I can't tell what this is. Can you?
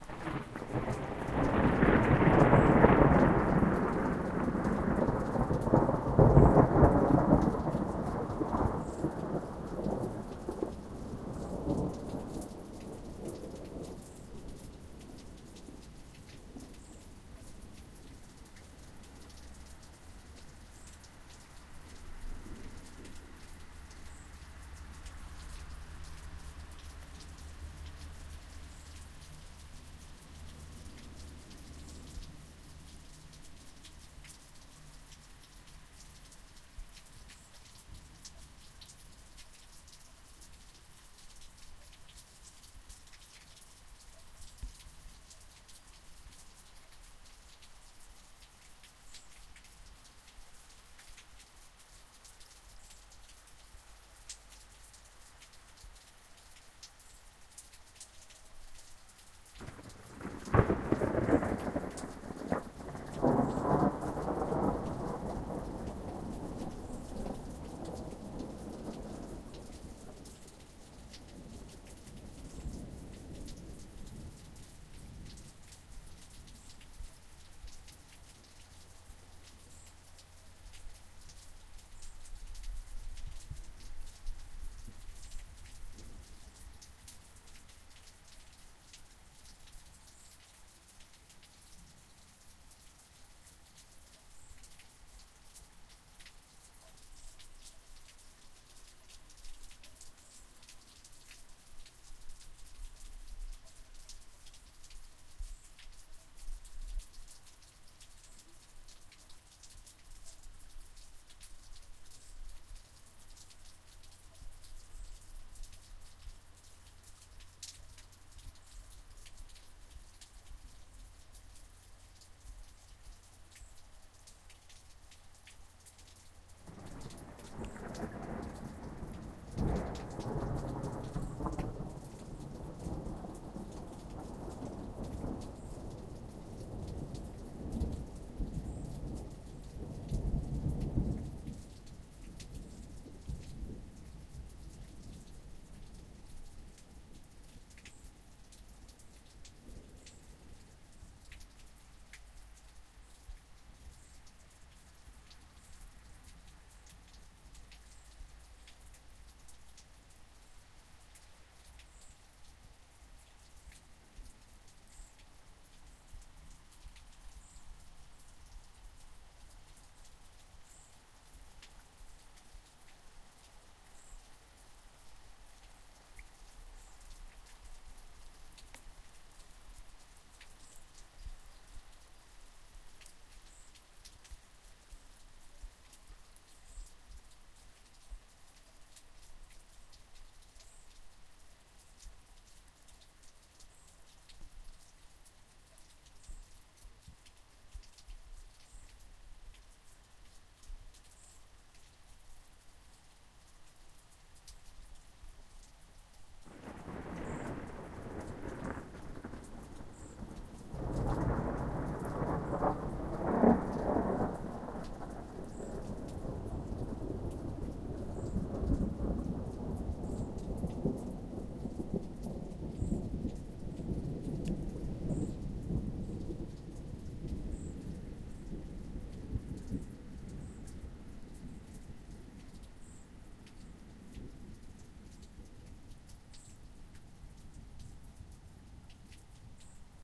Rain and thunder 2
Rain and thunder. Recorded with a Zoom H1.
storm, field-recording, rainstorm, lightning, weather, thunder, thunderstorm, rain